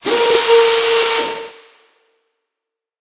Estlack lift 3
hydraulic lift, varying pitches